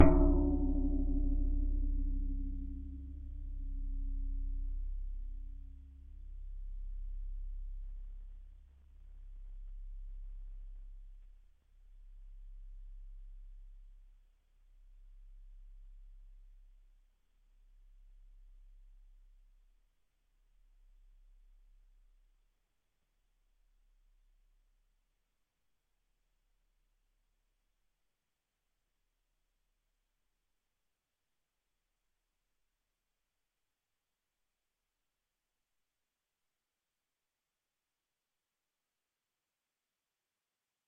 Gong - percussion 12 03
Gong from a collection of various sized gongs
Studio Recording
Rode NT1000
AKG C1000s
Clock Audio C 009E-RF Boundary Microphone
Reaper DAW
metal, iron, bell, hit, temple, clang, steel, ting, gong, chinese, drum, metallic, ring, percussive, percussion